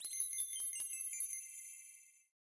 Bright digital GUI/HUD sound effect created for use in video game menus or digital sound application. Created with Xfer Serum in Reaper, using VSTs: Orbit Transient Designer, Parallel Dynamic EQ, Stillwell Bombardier Compressor, and TAL-4 Reverb.
game, application, bright, machine, click, short, clicks, gui, synthesizer, pitch, command, artificial, electronic, bleep, hud, computer, effect, sound-design, sfx, data, synth, serum, interface, digital, bloop, windows, noise, automation, blip